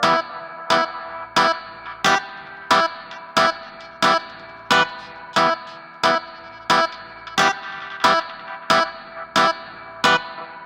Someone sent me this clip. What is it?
zulu 90 E key chop
Reggae rasta Roots
rasta, Reggae, Roots